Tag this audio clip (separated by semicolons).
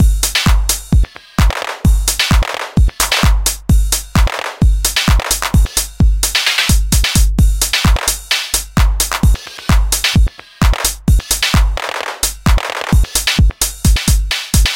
909; beatrepeat; house